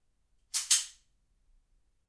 Gun reload single ambient
Fast reloading of a m9. Stereo and pretty roomy sound. Recorded with 2 rode condenser microphones.
ambient, 9mm, pistol, weapon, surround, fx, sound, gun, reload